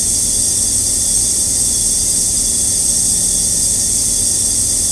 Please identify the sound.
Created using spectral freezing max patch. Some may have pops and clicks or audible looping but shouldn't be hard to fix.
Perpetual, Still, Soundscape, Background, Sound-Effect